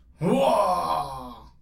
The sound of an old men growling.
Foley, Growl, OldMen